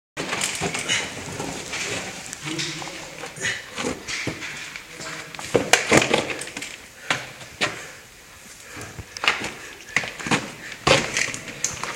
Man in a cave
man walking in a cave at madagascar
cave, climb, madagascar